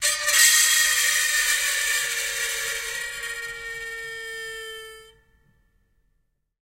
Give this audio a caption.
fingernails won't save you
recordings of a grand piano, undergoing abuse with dry ice on the strings
screech, ice, scratch, piano, abuse, dry, torture